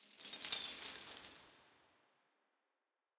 Dropped, crushed egg shells. Processed with a little reverb and delay. Very low levels!